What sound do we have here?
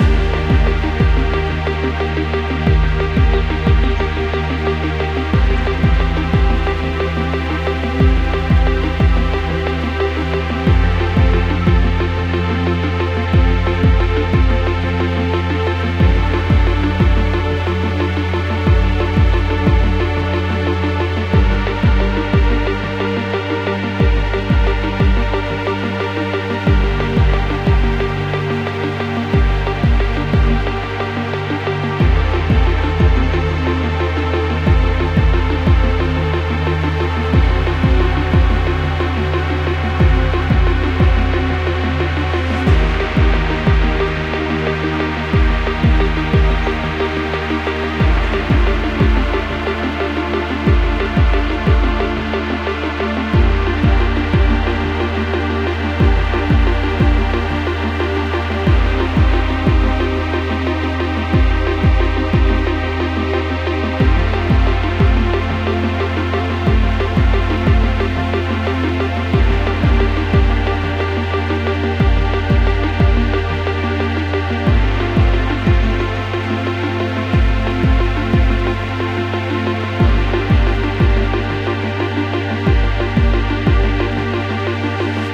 A simple electronic ambient loop in g-minor